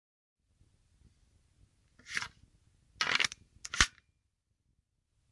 Pistol reload
Removing and replacing a mag of my airsoft pistol.